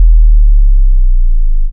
sine-sub-bass-e1-g0
Simple beautiful sub bass, a little slide from E to G. 140 bpm, one bar in length.
A very low frequency chirp generated in audacity starting at 41.2 and finishing at 24.5
With the decibal set to 0. Go ahead an try loading this in and changing the decibal gain to 6. Then try changing it to -6, see how different it sounds. But you probably already knew that :)